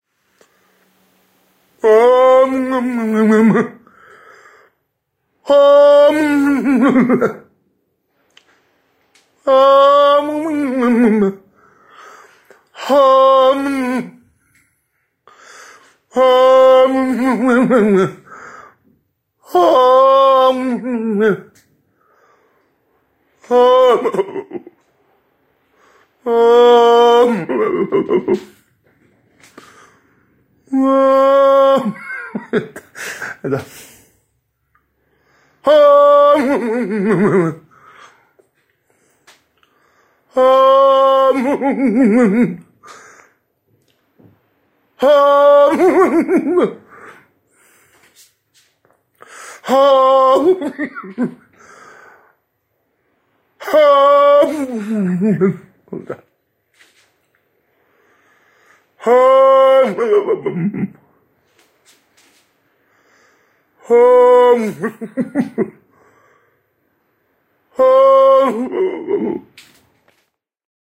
Old mans scream